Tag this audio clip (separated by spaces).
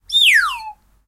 Whistle tin-whistle Descending Funny Descend Bamboo Slide Comic Cartoon